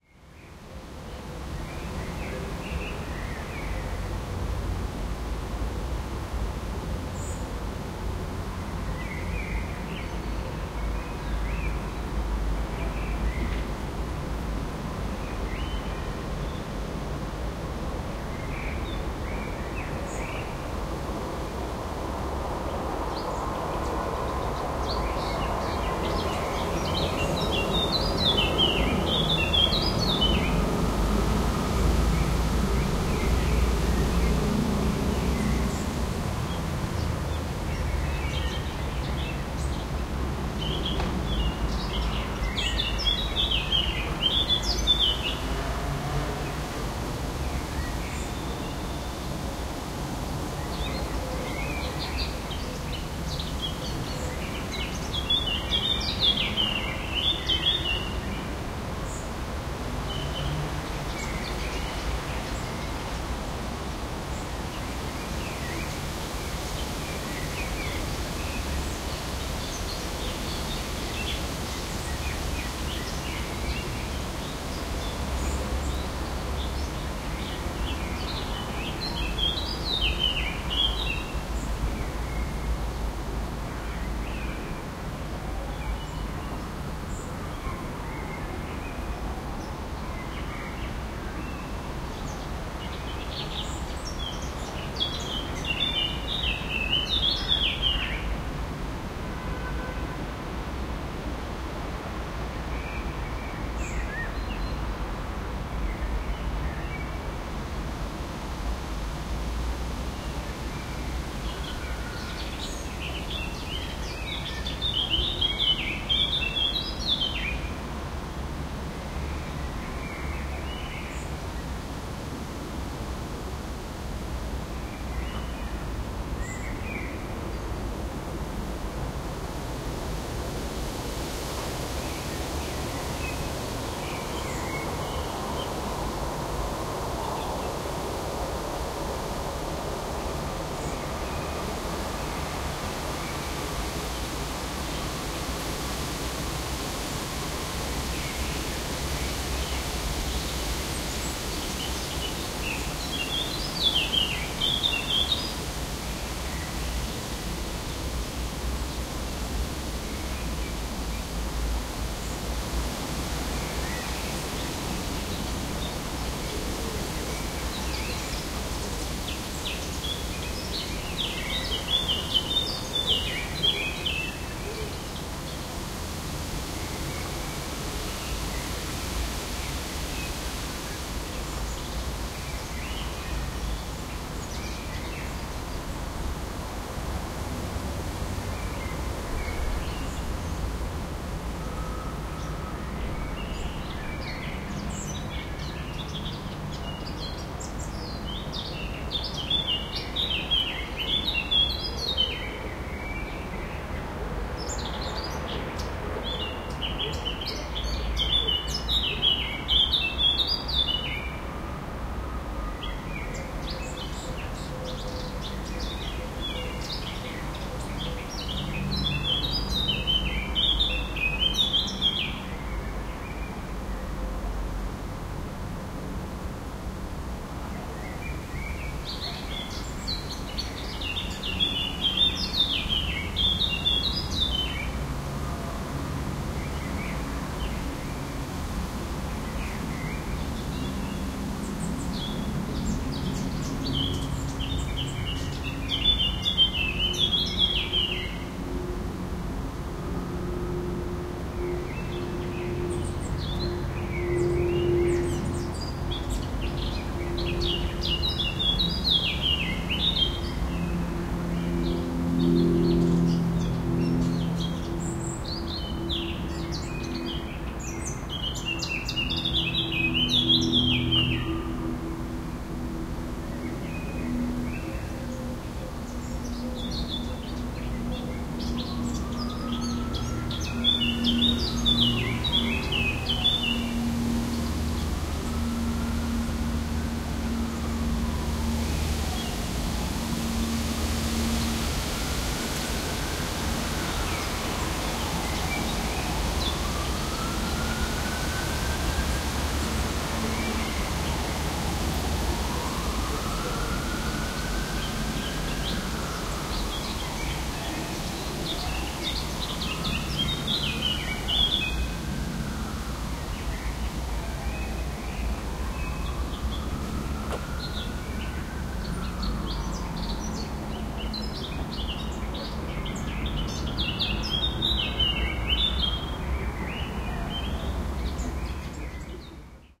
more than 5 minutes of calm suburban environment with traffic background, wind in the trees, birds, city train, ambulance siren and aircraft.